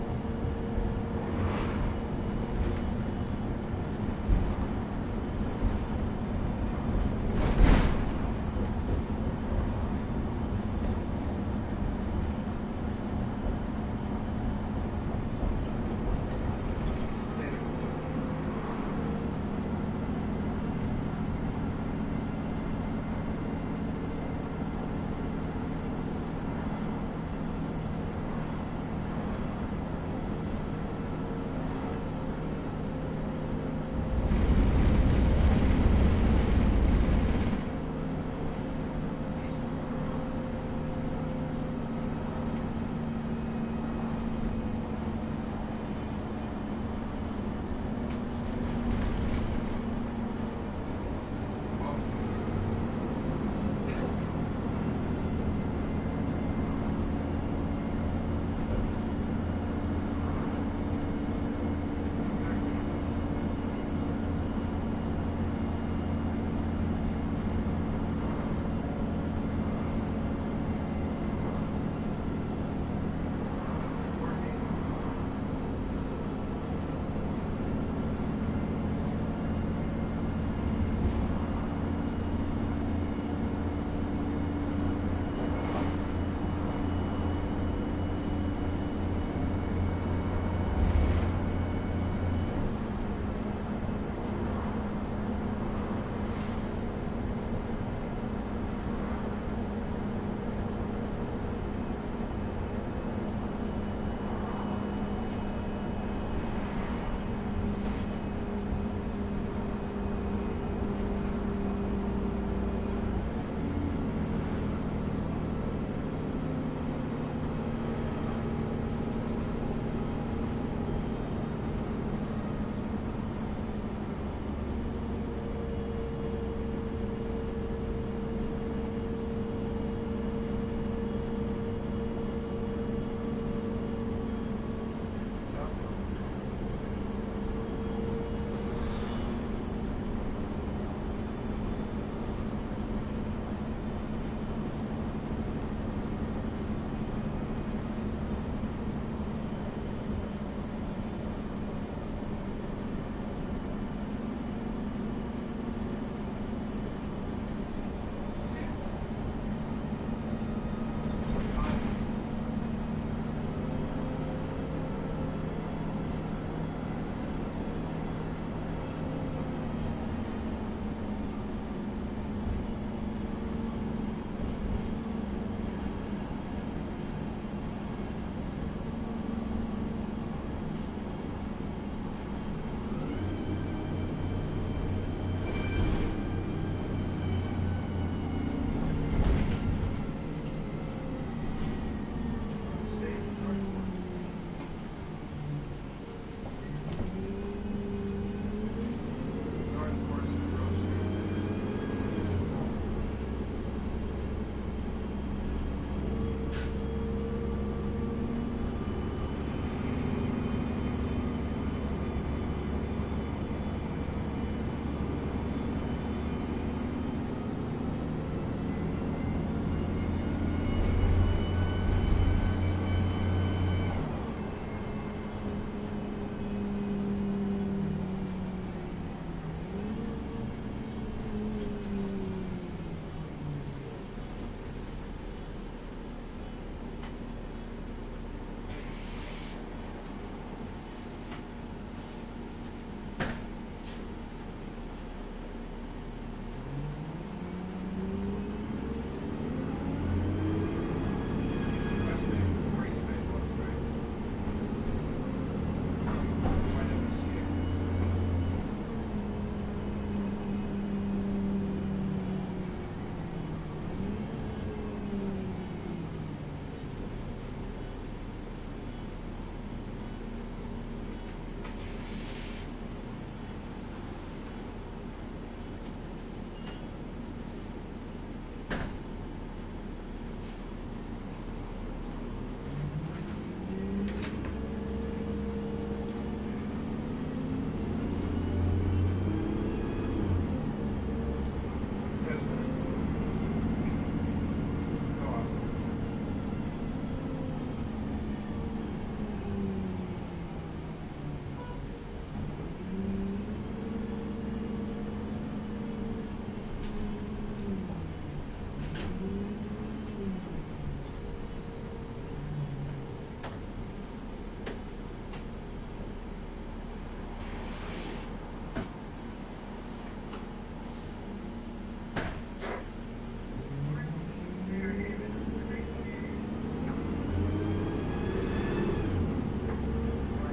A recording of a casual bus ride in downtown Bellingham.
recording, transportation, washington, ride, h4, field, bus, bellingham, handheld, dynamic, zoom